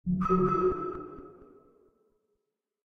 I used FL Studio 11 to create this effect, I filter the sound with Gross Beat plugins.
computer, digital, electric, freaky, future, fxs, lo-fi, robotic, sound-design